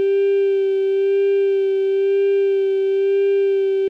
The vowel “E" ordered within a standard scale of one octave starting with root.
formant, vowel, e, voice, supercollider, speech